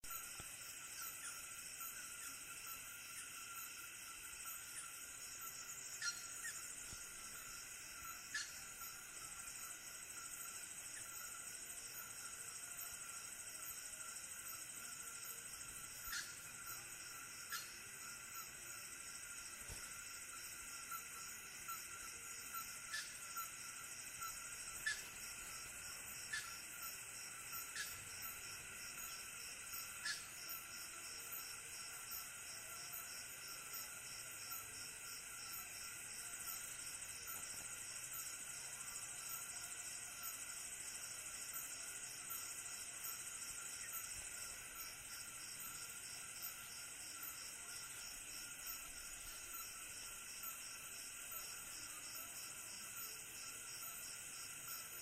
Recorded at Guene village - Benin